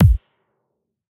I randomly synthesized a series of percussive hits with xoxo's physical modeling vst's than layered them in audacity
bass-drum, bassdrum, bd, kick, layered, synthesized